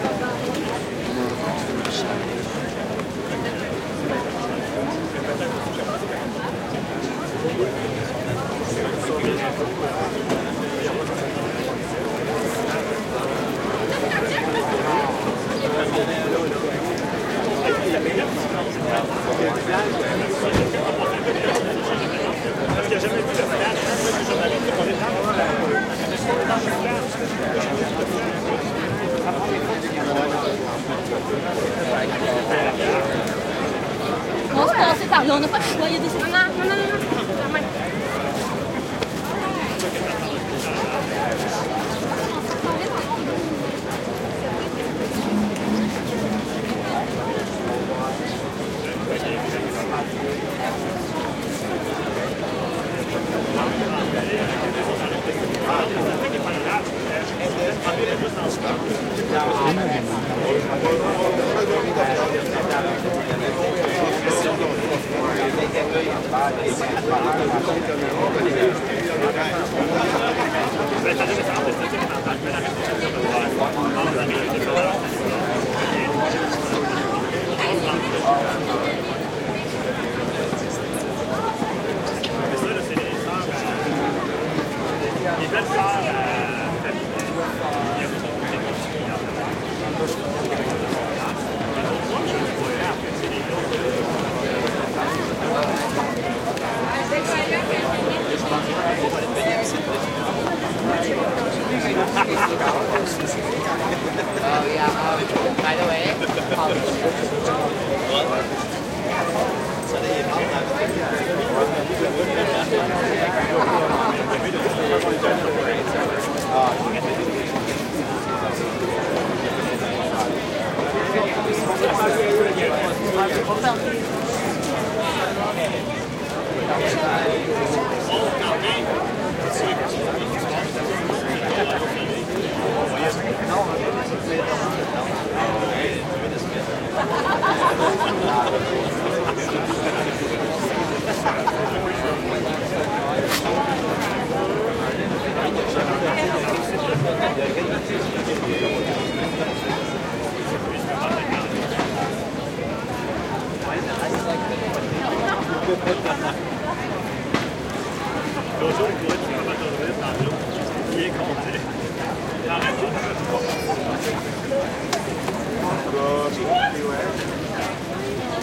crowd ext large dense street before parade in crowd Montreal, Canada
before, Canada, crowd, dense, ext, large, Montreal, parade, street